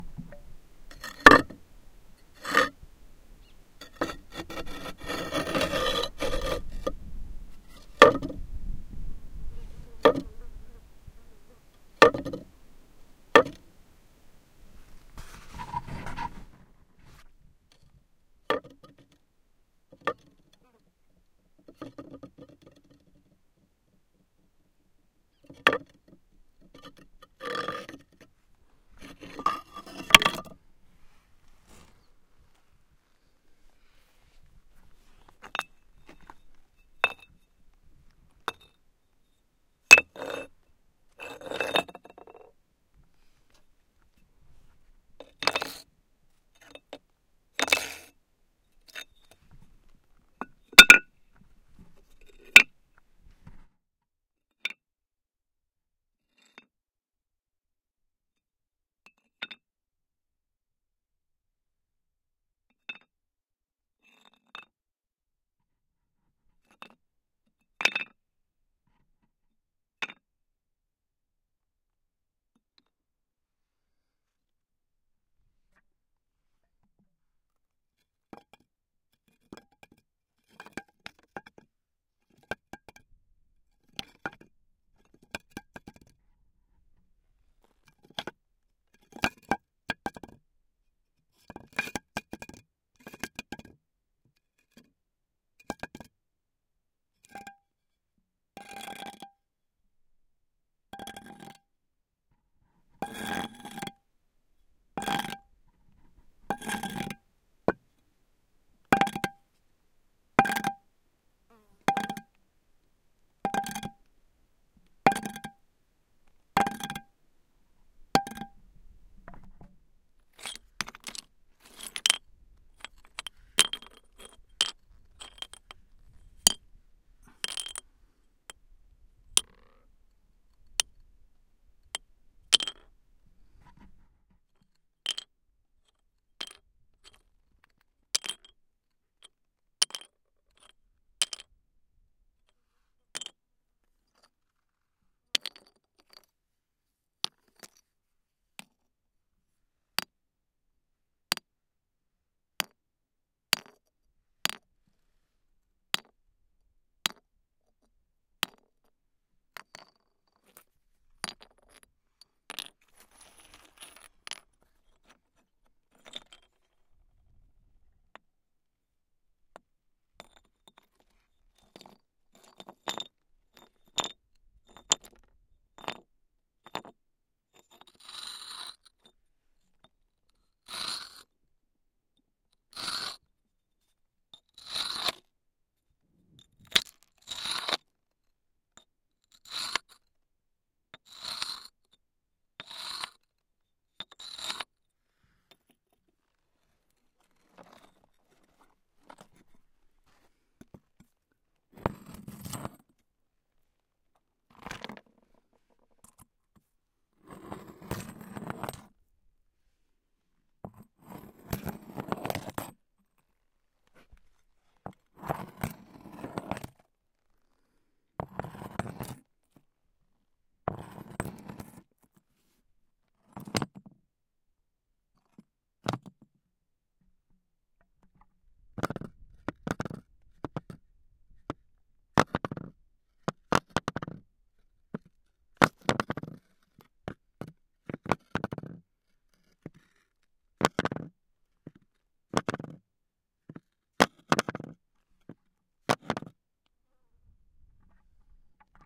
This is a long sample of me hitting, scratching and rolling all sorts of stones against one another, somewhere in the Moroccan desert. The sounds are pretty good quality, recorded with a Sony PCM-D50.